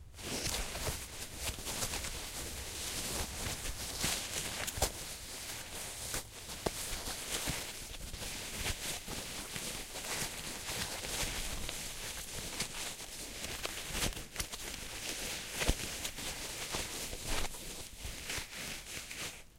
recordings of various rustling sounds with a stereo Audio Technica 853A